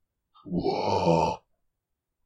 Zombie Attack 1
sound of an attacking zombie